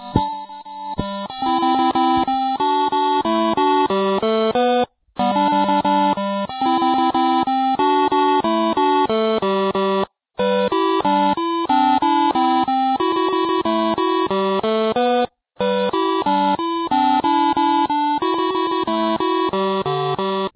recording of a handheld game tronic device. i connected the device directly from the plate to the audio in of my computer. so the sound is kind of original. the batteries were little low, so the audio is mutated and sounds strange.